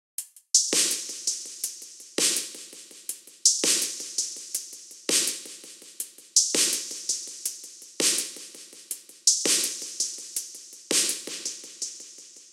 165 bpm - Broken Beat - Backbeat
This is a small Construction kit - Lightly processed for control and use ... It´s based on these Broken Beat Sounds and Trip Hop Flavour - and a bit Jazzy from the choosen instruments ... 165 bpm - The Drumsamples are from a Roality free Libary ...
Backbeat, Beat, Broken, Construction, kit